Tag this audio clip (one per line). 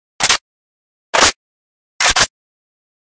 AK47
Ak
reload
AK74